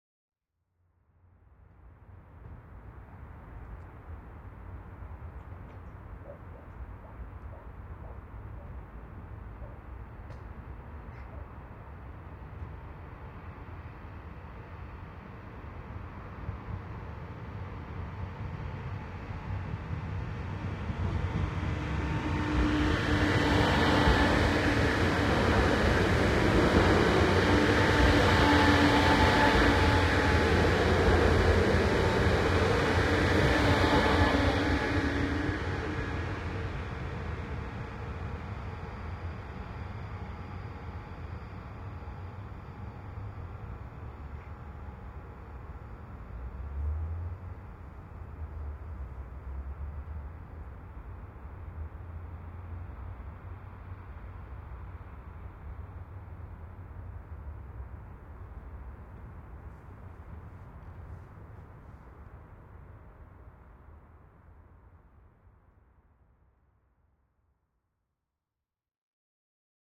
897e AB quiet train passing 16 15 22-02-27 MixPre-515
This is a stereo recording of a quiet modern train passing.
The recording position was below the tracks, as the tracks were on the embankment.
897e AB KRAKÓW quiet train passing 16_15 22-02-27 MixPre-515
modern-train, tracks, railroad, train, quiet-train